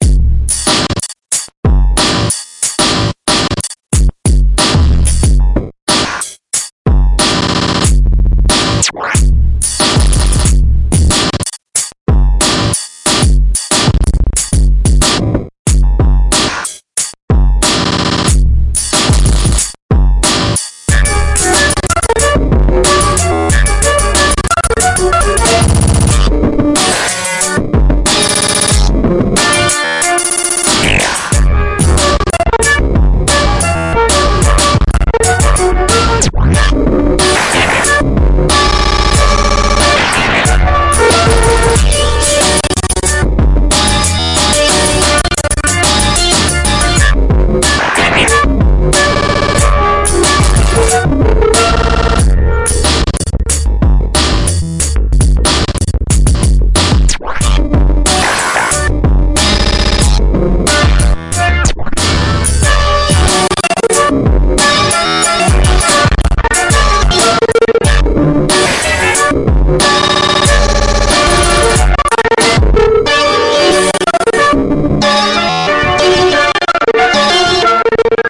92 BPM Crazy Ass Shit
Created in Fruity Loops.
92 BPM
dbBlue Glitch v1 VST.
Various Drum Samples